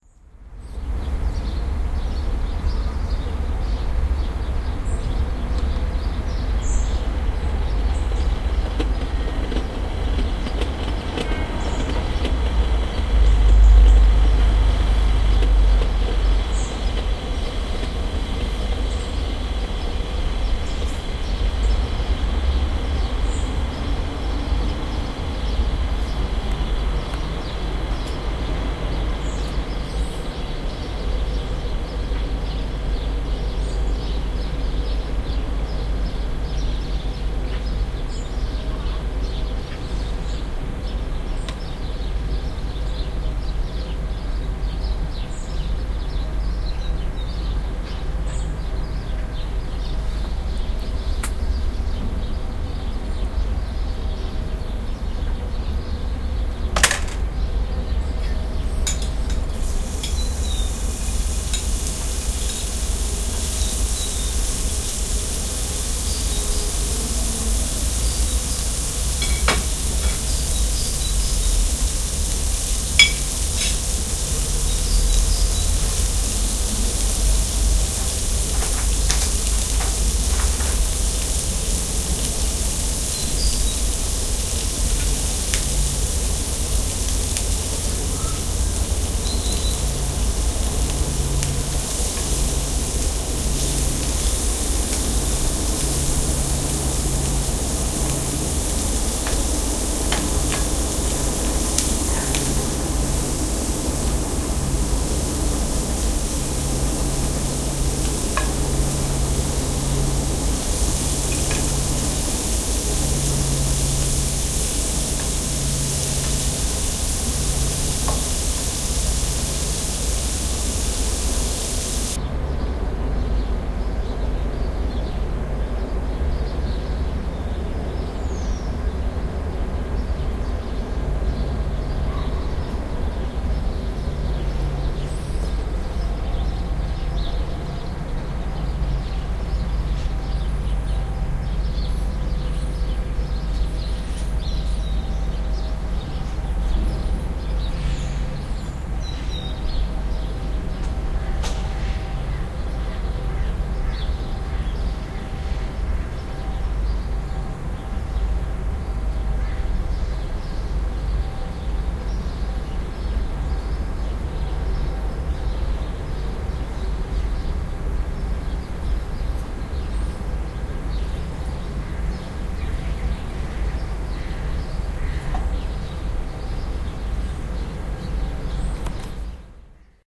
marv sound mix 2
As sound mix 1, except with the addition of toaster by Dobroide, and baking bacon by WIM